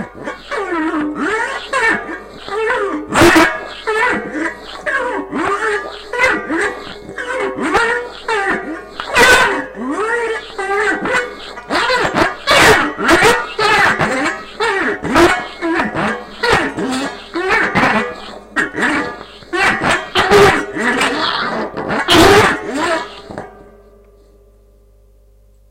Guitar conversation
When no humans are nearby, guitars of old age can discuss things they have in common. You didn't know? So it is.
acoustic, conversation, guitar, music, speak, speech, tones